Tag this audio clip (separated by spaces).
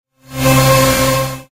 abletonlive granular maxmsp phasevocoding soundhack time-stretched upliftmid1